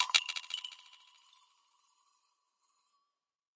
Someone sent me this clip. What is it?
Metal Drops 6
Tweaked percussion and cymbal sounds combined with synths and effects.